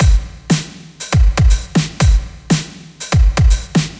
Just a drum loop :) (created with flstudio mobile)
drums, dubstep, loop, synth, beat, drum